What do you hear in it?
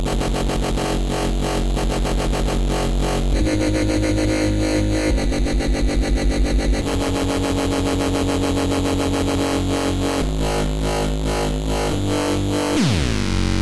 Another harsh dubstep bassline. Only for those who really need it....
bassline, brostep, dubstep, filthy, harsh, loop, midrange